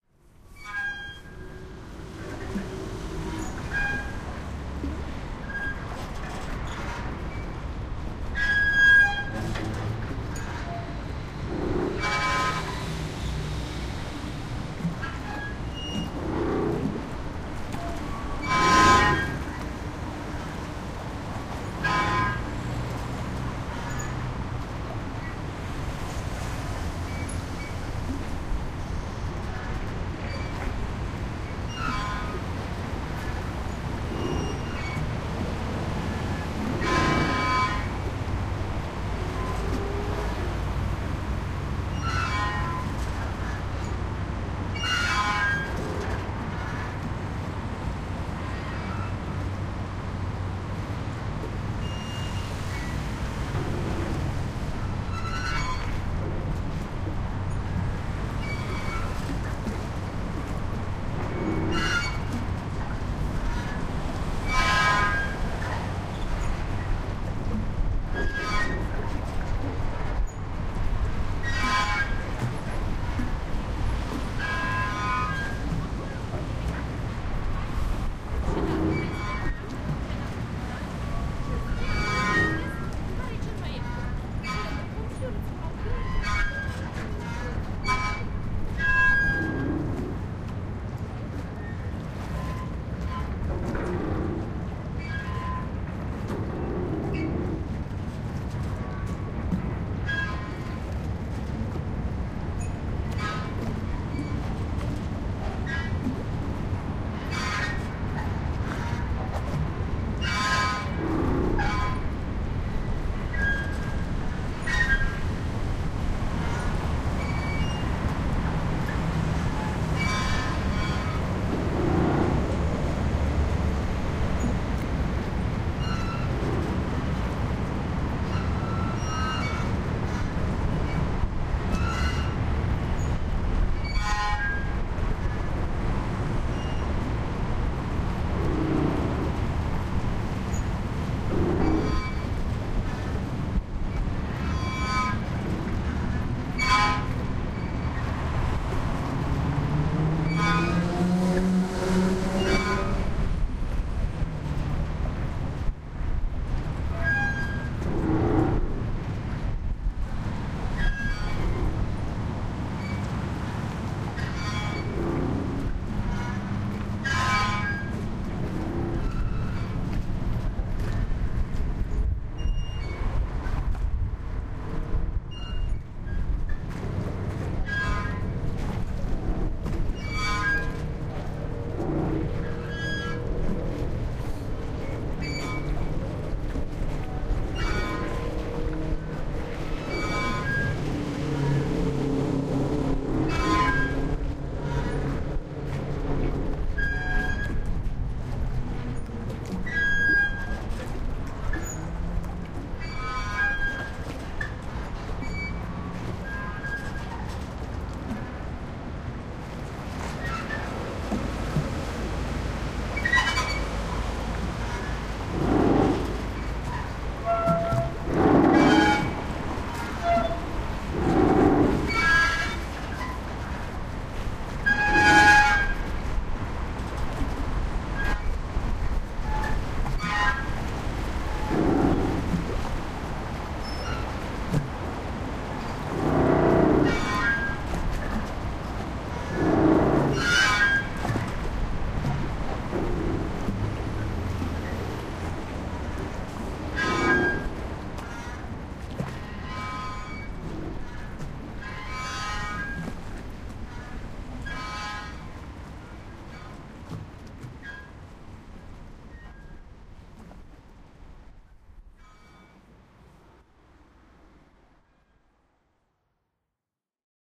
Moored Boat Metal Grinding, Groaning & Creaking
Recording of an dilapidated boat on an old mooring in the Thames, London, UK.
boat, creak, groan, iron, metal, metallic, mooring, river, scrape, steel, thames